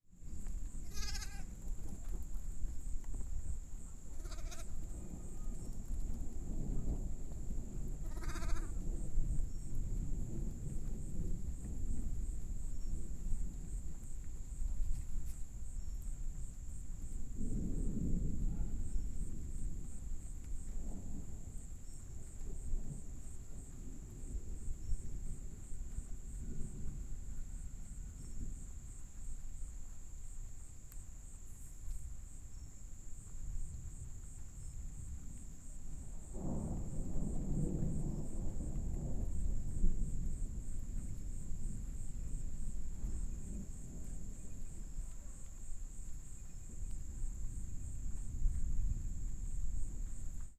AMB Ghana Thunder, Crickets, Goats LB
Lite Thunder storm with crickets and some goats near Biakpa, Ghana
Africa, Thunder, goats, Ghana, Crickets